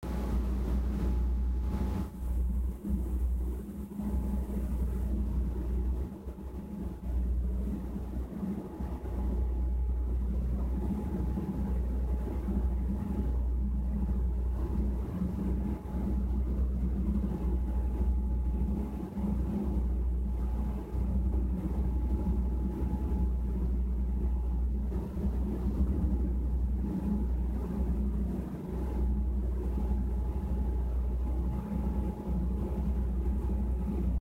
Original Ambience Sound Unaltered
This is the original recorded file, unaltered!
I recorded this with my phone in my houses stairwell.
ambiance, ambience, ambient, atmos, atmosphere, background, background-sound, empty, factory, general-noise, Indoors, Industrial, noise, room, sound-effect, soundesign, soundscape, stairwell, tone